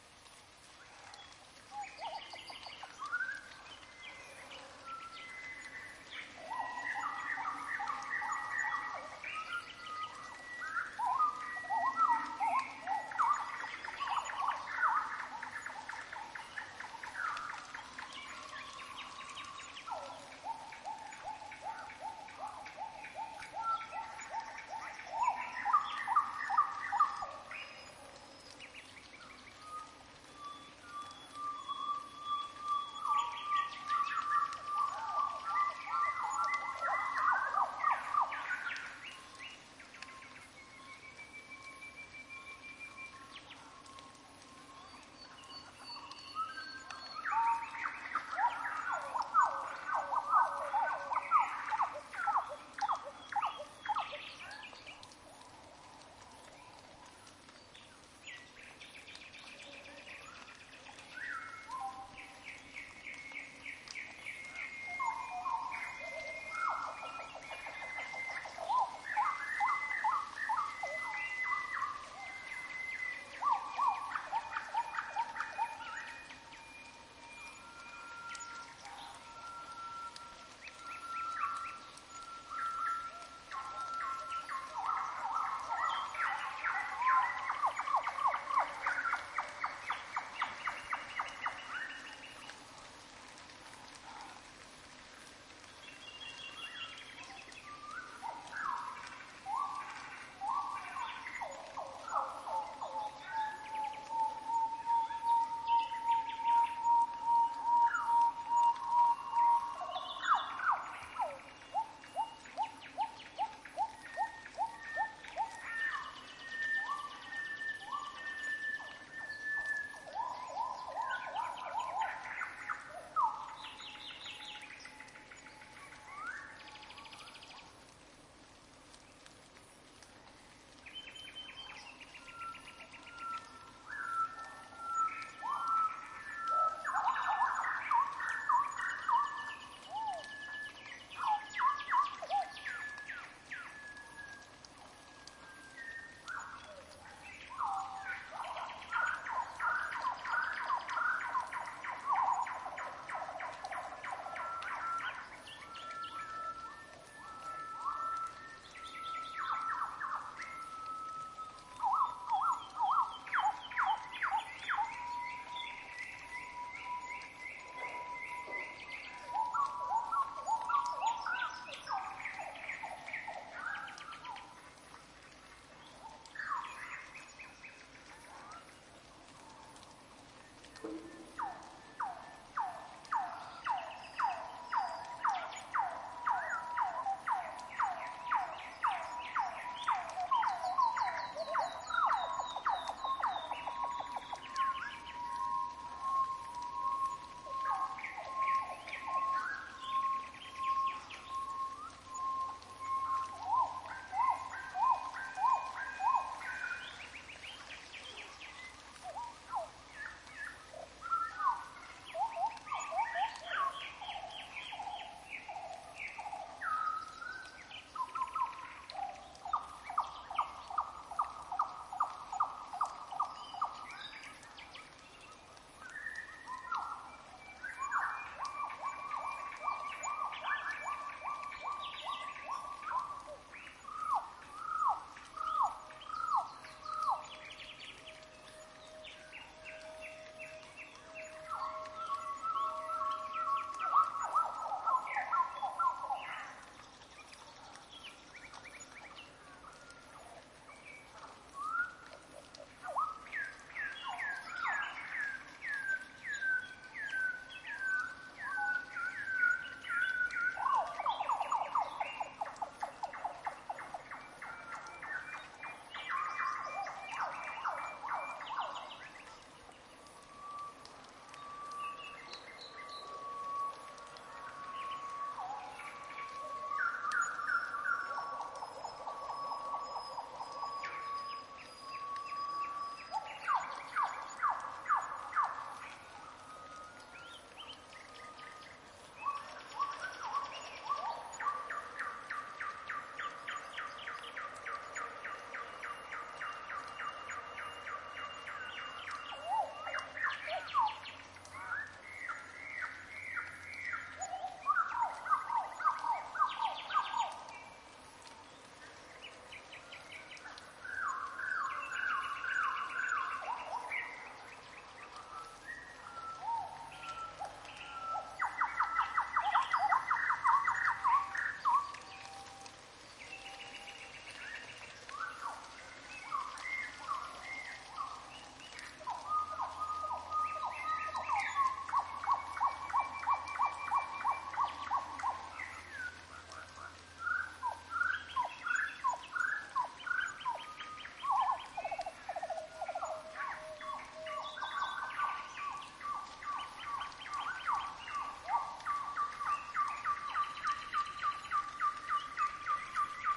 Nightingales - Fairy Tale Forest - Downmix to stereo
Recorded with Zoom F8 and 4xNT1-A in IRT-cross
Outdoor, field-recording, HQ, bird, forest, Zoom-F8, NT1-A, birds, birdsong, ambient, nature, Nightingales